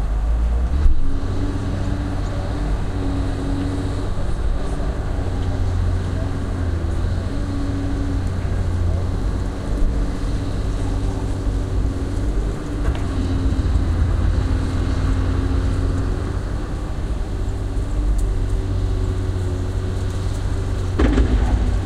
Construction site, landfill 04
contract, lot, damage, ecological, field-recording, dredger, waste, noise, ecocide, destruction, area, environmental, landfill, damaged, construction, engine, excavator, ambient, yard, site, building, destroying, garbage, background, dump, digger